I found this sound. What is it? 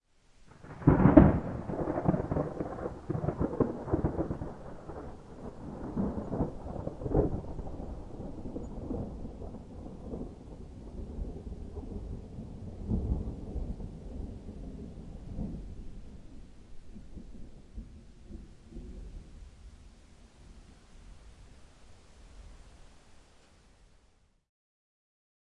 Thunder Clap
thunder, thunder-clap